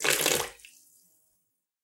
dripping water in to a bucket. Recorded with a stereo microphone.